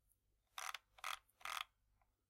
A recording of scrolling three times down, on a logitech cordless optical mouse.
Recorded with a superlux E523/D microphone, through a Behringer eurorack MX602A mixer, plugged in a SB live soundcard. Recorded and edited in Audacity 1.3.5-beta on